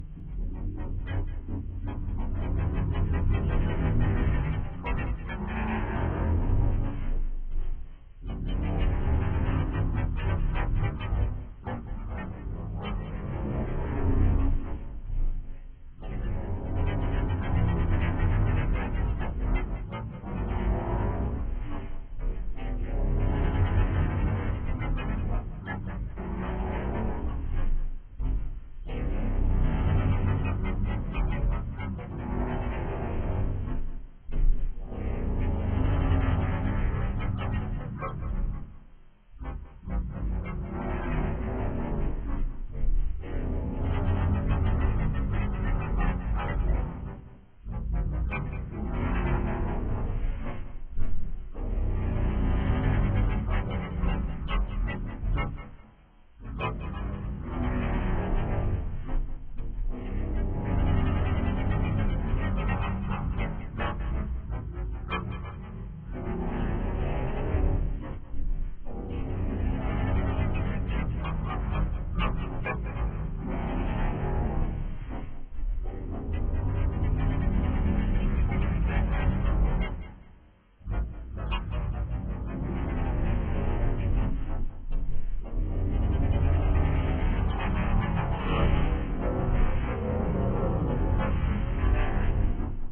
What if V'ger Spoke?
This emulates the ambient noise of a planet sized computer and its associated gargantuan processes. I aimed for a "What if" scenario. What if V'ger could speak to other similar artificial entities of enormous size and complexity.
Originally recorded on Zoom H2. The sound was originally a styrofoam take-out container which I pressed down on and released several times. I then filtered it.
alien, aliens, alternative, background, computer, dimensional, eerie, fiction, odd, otherworldly, planet, planets, plastic, science, science-fiction, sci-fi, scifi, sfx, sounds, strange, weird, zoom